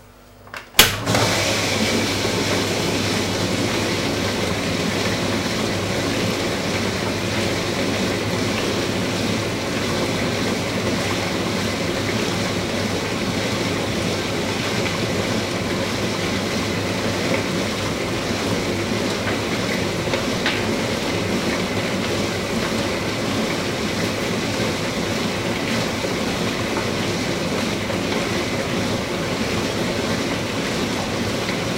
sounds made by a dishwasher / sonido de un lavaplatos